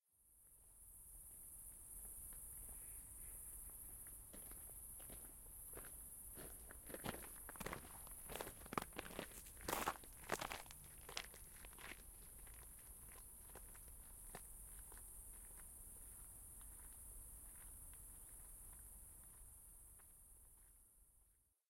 gravel stone walk hike suburban park crickets
crickets, gravel, hike, park, step, stone, suburban, walk